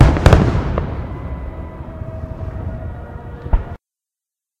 recording of a double firework explosion with some distant orchestra playing